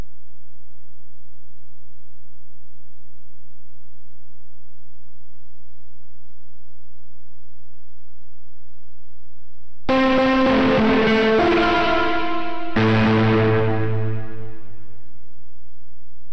Testing my VST host audio out for crosstalk of secondary sounds from media player. I wanted to see if recorded waves from VST would include mix from soundcard in recording.
test, sound